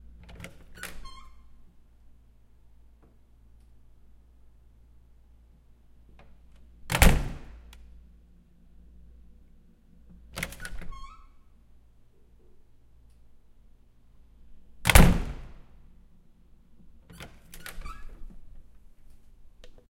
My wooden apartment door opening and closing several times.